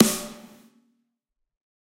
Snare Of God Drier 019
drum, snare, drumset, pack, realistic, kit, set